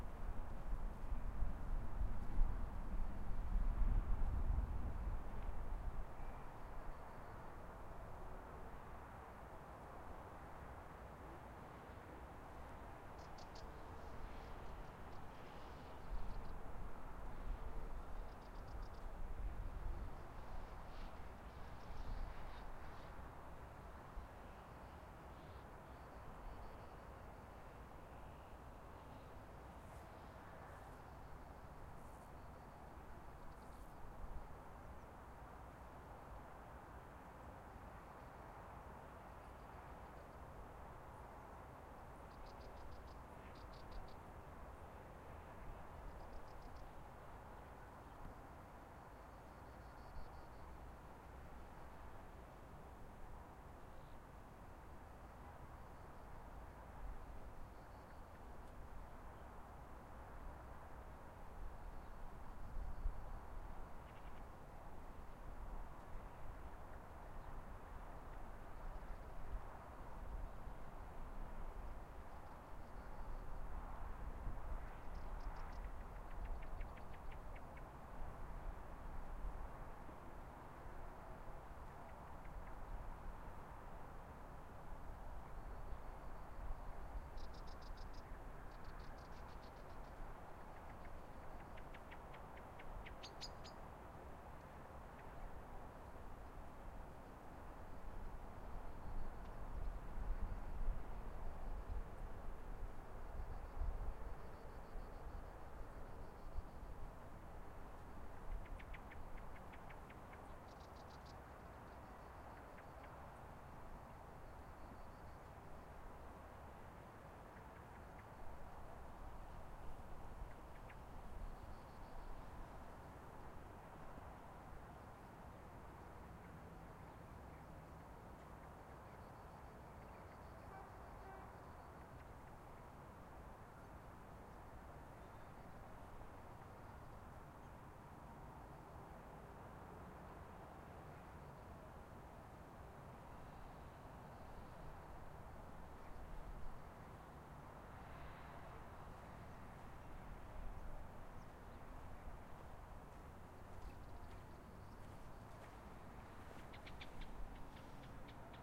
Mountain Ambience Recording at Mirador Montbau, August 2019. Using a Zoom H-1 Recorder.